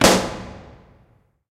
Balloon-Burst-02-edit
Balloon popping. Recorded with Zoom H4
burst, balloon